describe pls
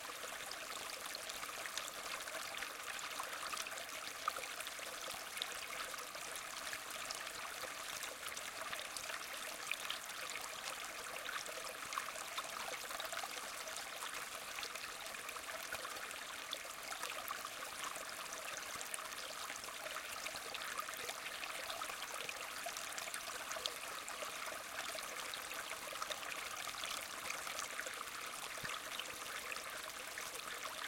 small river stream in Malmedy (Belgium). recorded with zoom iq6.
ambiance, nature, trickle, gurgle, water, ambient, river, flowing, field-recording, relaxing, creek, flow, babbling, brook, stream, liquid, splash